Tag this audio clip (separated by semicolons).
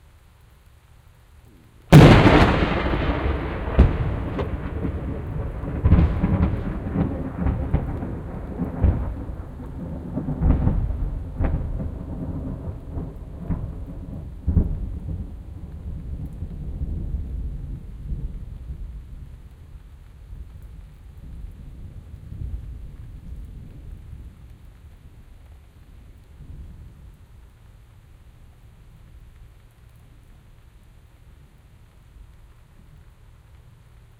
field-recording; thunder; binaural; storm